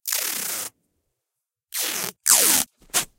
Scotch tape noises